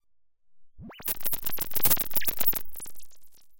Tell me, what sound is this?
Attack Zound-226
Some radio interference, not real but simulated. This sound was created using the Waldorf Attack VSTi within Cubase SX.
electronic, soundeffect